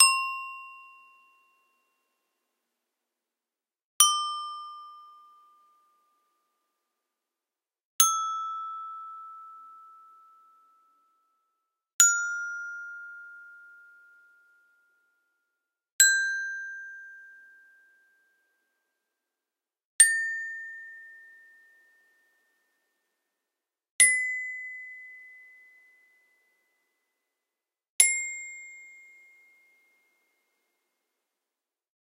Sampled a toy Glockenspiel. C Major scale. Each note runs for 2 bars set to 120bpm. Enjoy!